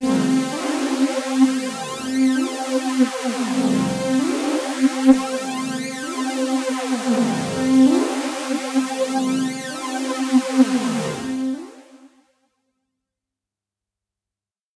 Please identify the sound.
Dirty Phaser - C4
This is a sample from my Q Rack hardware synth. It is part of the "Q multi 008: Dirty Phaser" sample pack. The sound is on the key in the name of the file. A hard lead sound with added harshness using a phaser effect.
waldorf, multi-sample, phaser, lead, synth, electronic, hard